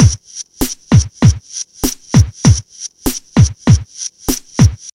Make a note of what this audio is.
Drum Loop Disco(ish)
Beat, Disco, Drum, MPC